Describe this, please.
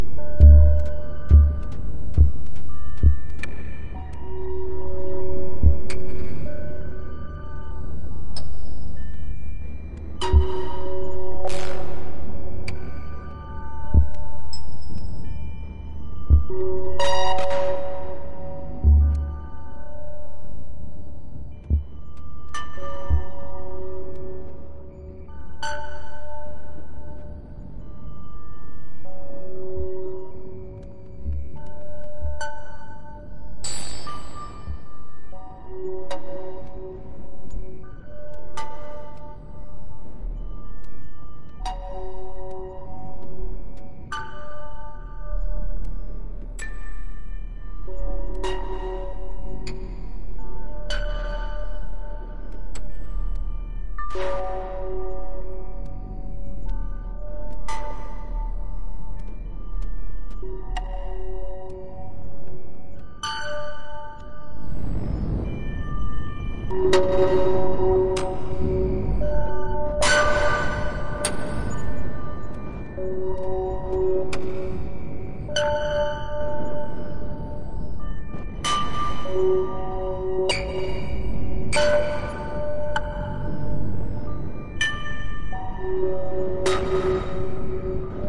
Cloudlab-200t-V1.2 for Reaktor-6 is a software emulation of the Buchla-200-and-200e-modular-system.
2, 200t, 6, Buchla, Cloudlab, Emulation, Instruments, Native, Reaktor, Runs, Software, That, V1